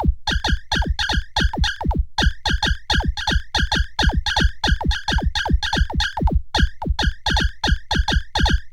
8bit110bpm-31
The 8 Bit Gamer collection is a fun chip tune like collection of computer generated sound organized into loops
110, 8, 8bit, bit, bpm, com, loop